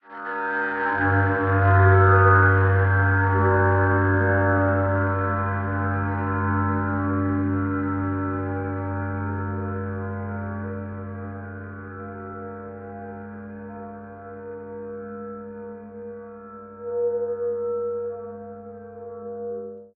Abstract Guitar, Resonated
An emulation of an electric guitar synthesized in u-he's modular synthesizer Zebra, recorded live to disk and edited and time-stretched in BIAS Peak and GRM's Reson.
blues, electric, guitar, metal, psychedelic, rock, synthesized, Zebra